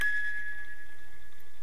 One note from an antique wind-up toy. Recorded with a relatively low-quality mic at a close distance; you can hear the machine of the music box churning and some faint ambient humming.